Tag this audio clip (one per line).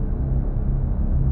energy
deep
shield
darkness
barrier
magic
looping
drone
magical
loop
seamless
dark